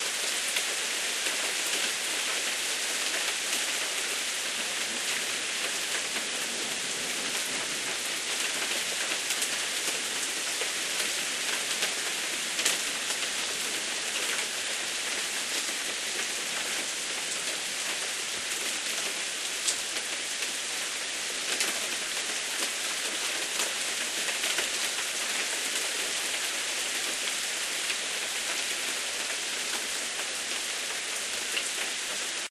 AMBIENT - Rain - Under Plastic Overhang (LOOP)
long loop of steady rain on a plastic overhang.
Snapping and popping of raindrops hitting plastic.
Subtle hints of wind shear on mic can be detected.
rain, outdoors, rainfall, nature, drainpipe, shower, weather, sprinkle, fresh, field-recording, clean